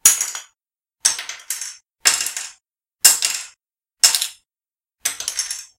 LEGO Brick falling (Distance)
Multiple recordings of a LEGO Brick falling on a flat surface.
brick, fall, falling, lego